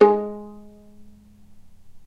violin pizzicato "non vibrato"